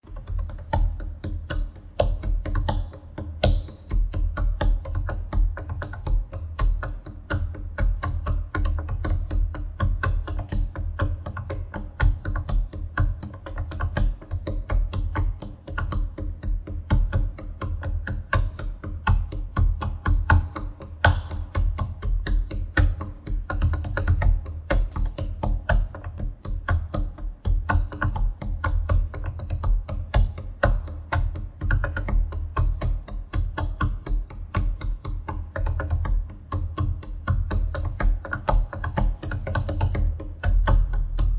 Table Drums
This is someone hitting a table like they are playing the drums.
Drums, field-recording, Music